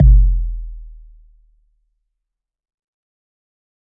ss-tanger
A knock or block like pulse
percussion, electronic